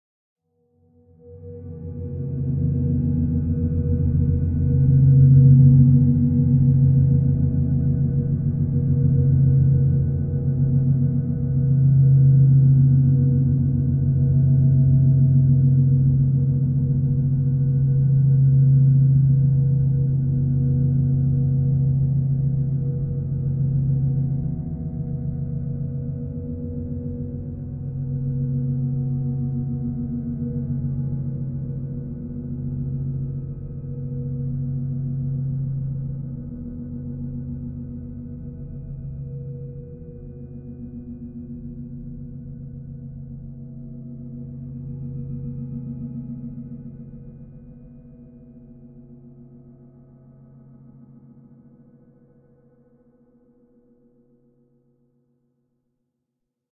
rev spaceship drone full wet resample 3 - deep
A deeper, more background version (lowered by convolving through a drum n bass kick drop fx from a song I did) - recording of a saucepan lid span on a ceramic tiled floor, reversed and timestretched then convolved with reverb.
All four samples designed to be layered together/looped/eq'd as needed.
background, space, fx, timestretched, drone, metallic, spaceship, grating, processed, reverb